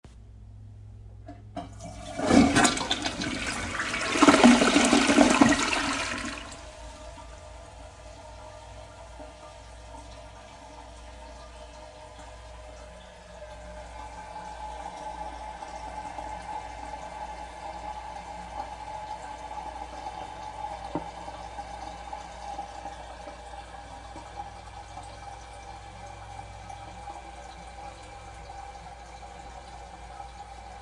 Empty Toilet Flush
A recording of a clean toilet being flushed. The microphone is placed above and slightly in front of the bowl.
toilet, flush, hiss